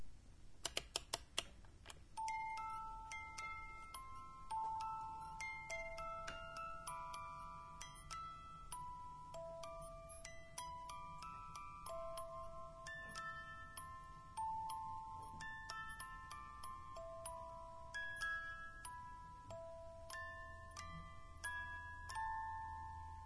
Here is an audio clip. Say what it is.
This is a sound of an old music box. I hope you will enjoy it!